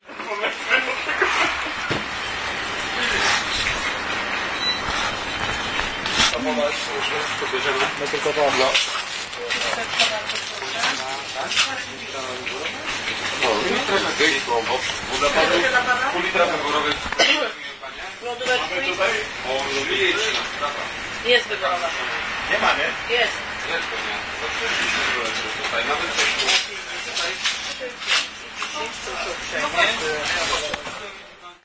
27.09.09: about 00.00, in the off-licence 24h shop on Garbary street in Poznań. some dudes are buying vodka Wyborowa.
24h, night, offlicence, people, shop, shopping, vodka, voices